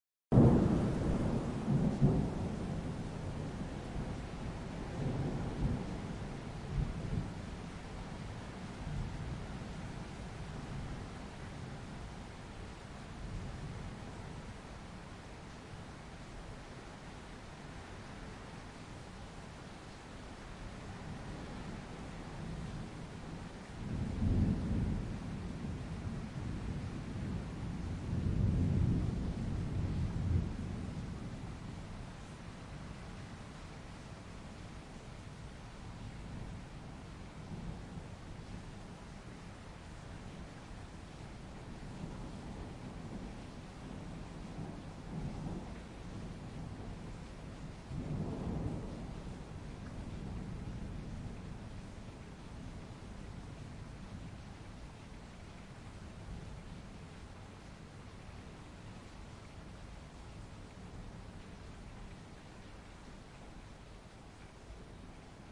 Storm from indoors
Field recording of a damaging Spring storm in Australia. Torrential downpour, rain, wind and thunder recorded from behind closed doors on Zoom H4n Pro.
lightning, nature, wind, recording, thunder-storm, storm, weather, thunderstorm, gale, rain, thunder, field